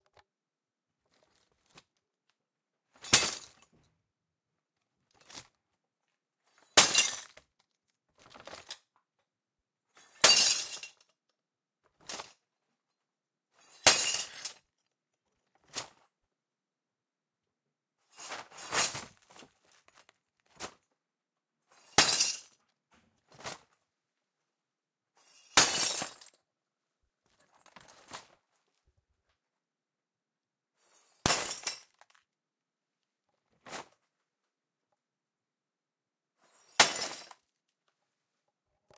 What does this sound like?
Glass bag drops
A paper bag of broken glass shards is dropped around 5 ft. Recorded with TASCAM DR-1.
smash, shatter, shards, drop, glass